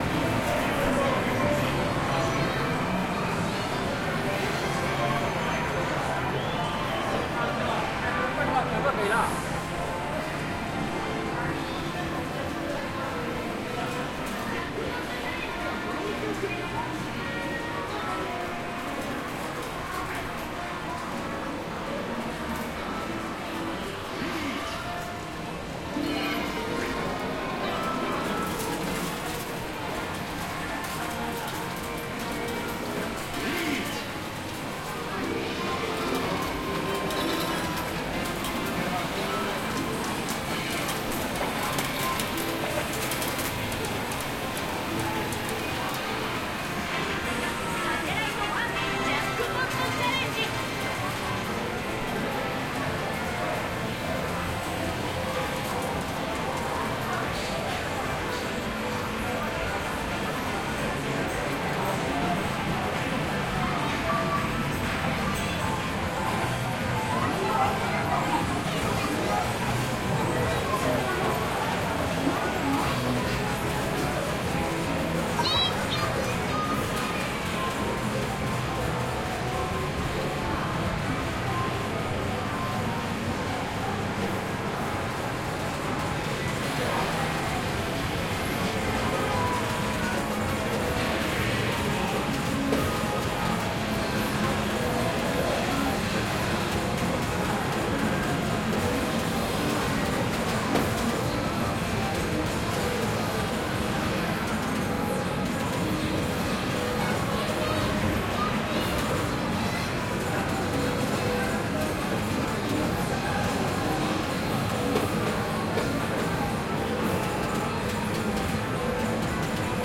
Tokyo - arcade center floor 2 CsG
japan, sega, tokyo, ambience, arcade